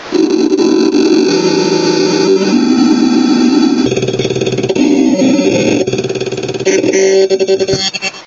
speaker sound test
Sound from smal speaker generated by microcontroller
digital, microcontroller, noise